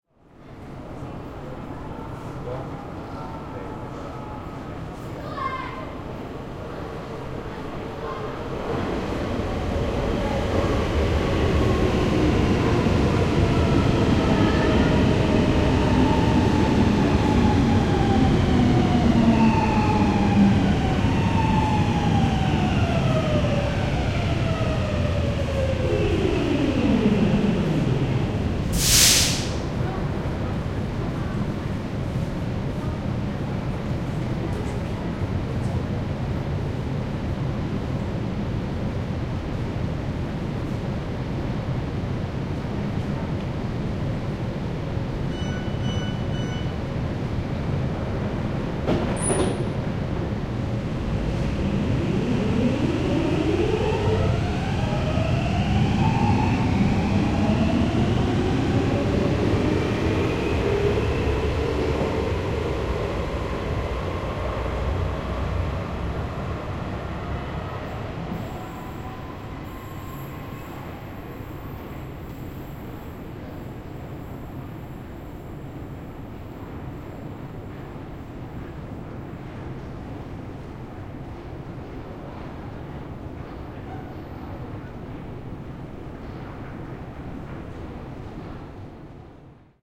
Metroasema maan alla. Juna tulee, pysähtyy, ovet, signaali, 3 x piip, lähtö, etääntyy.
Äänitetty / Rec: Zoom H2, internal mic
Paikka/Place: Suomi / Finland / Helsinki
Aika/Date: 30.07.2008